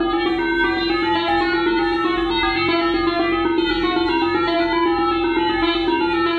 Mechanical Sequence 008 LOOP
One of the best sounds of this type - seamless loop! Enjoy!
This time the input from the cheap webmic is put through a gate and then reverb before being fed into SlickSlack (a different audio triggered synth by RunBeerRun), and then subject to Live's own bit and samplerate reduction effect and from there fed to DtBlkFx and delay.
At this point the signal is split and is sent both to the sound output and also fed back onto SLickSlack.
Ringing, pinging, spectraly modified pingpong sounds result... Sometimes little mellodies.
RunBeerRun, SlickSlack